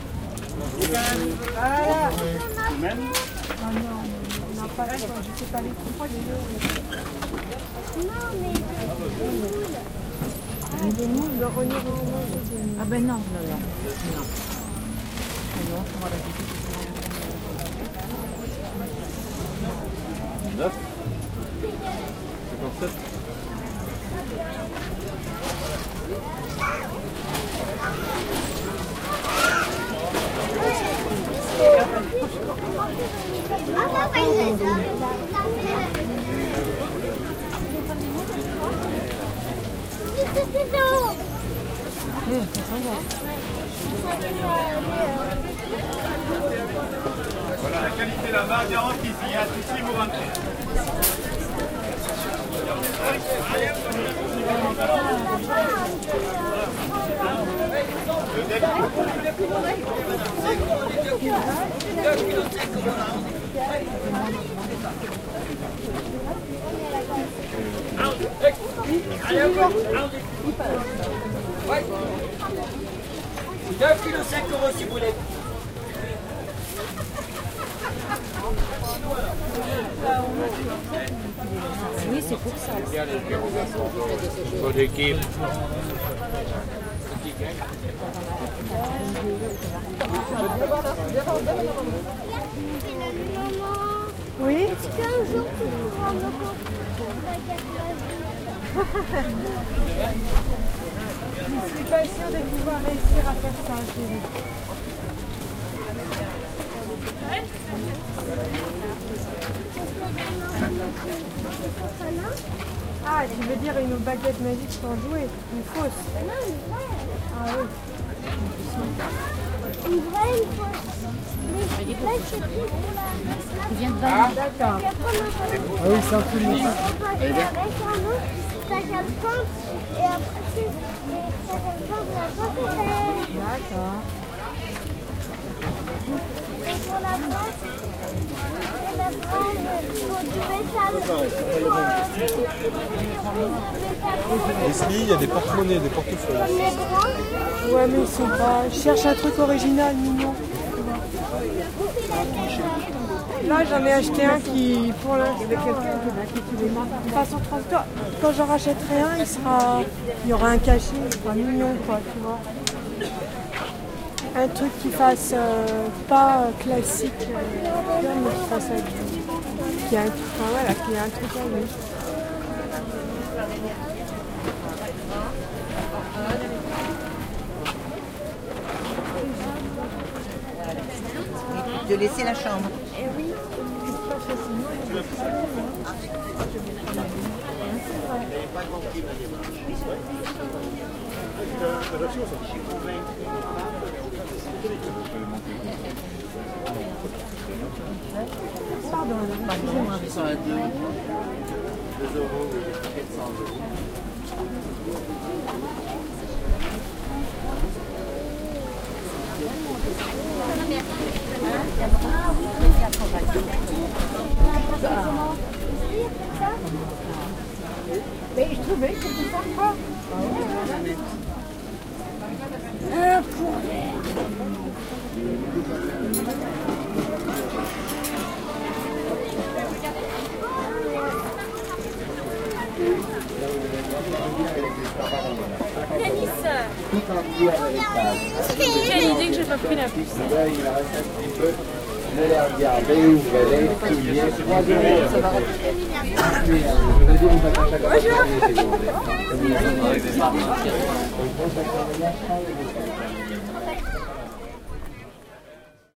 field recording of a market in Britanny, France. Voices, bits of conversations in french, sellers.
Bretagne, Britanny, France, french-language, march, market, Quiberon